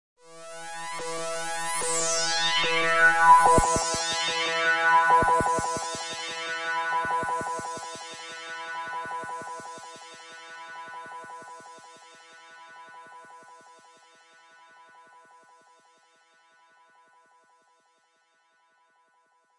zebra jupiter with deelay
sound made with ableton live 8. zebra- jupiter with some compression and delay.
delay, dub, echo, fx, reggae, soundesign